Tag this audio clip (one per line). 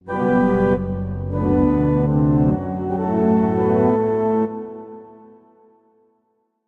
Ambient
Church
Dark
Orchestra
Organ